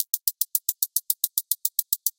hi hat loop
hat, hi, loop